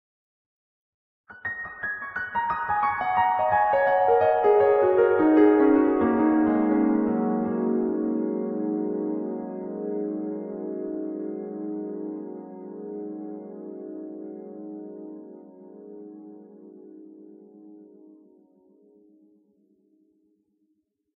[10] s-piano penta down 1
Piano piece I played on my Casio synth. This is a barely adjusted recording with a record-tapeish chorus already added in the synth.
pentatonic, piano